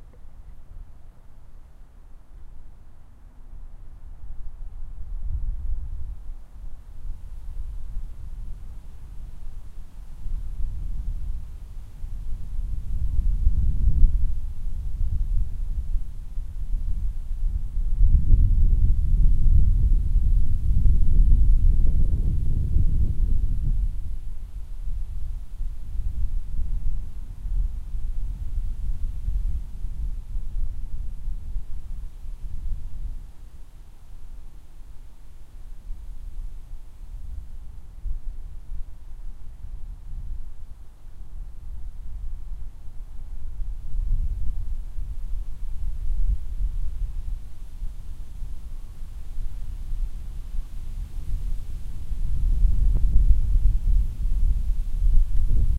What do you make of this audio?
Dude it's wind and some background traffic noises. Nothing special.
field-recording, outside